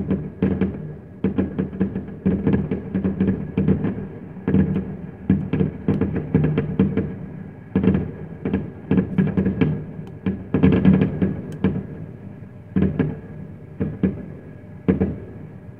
Recording of fireworks, which can be used as the base for creating a gunfire, mortar, explosion sound effect.
firecrackers, years, bomb, new, mortar, explosion, fireworks